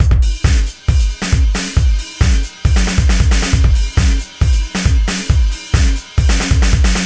killdacop drums 136 04
Segmented group of loops from a self programmed drums.Processed and mixed with some effects.From the song Kill the cop